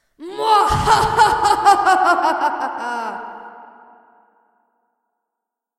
evil laugh 3
Evil laughter recorded for a production of Sideways Stories from Wayside School. Reverb added.
cackle, evil, female, girl, laugh, laughing, laughter, woman